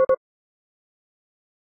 2 beeps. Model 2